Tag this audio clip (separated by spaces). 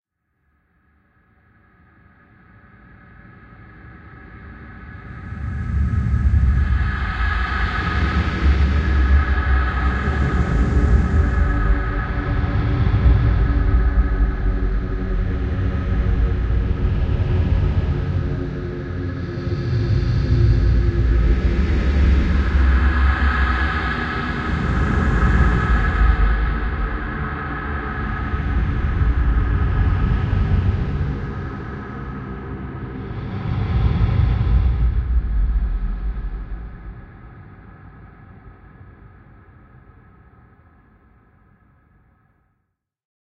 bass dark delay echo fx horror noise reverb vocal voice